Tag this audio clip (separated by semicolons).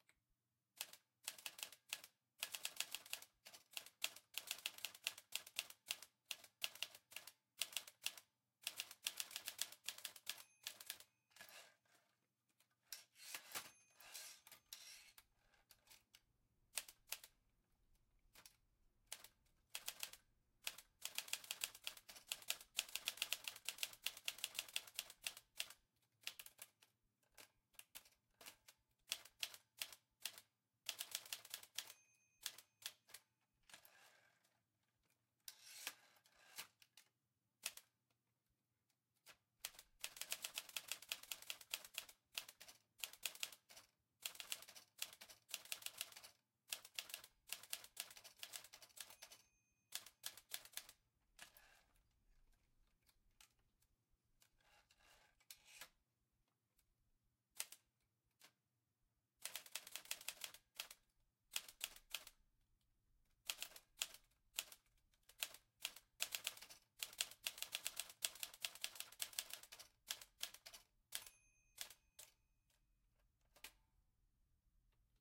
fashioned; old; typewriter; ambiance; office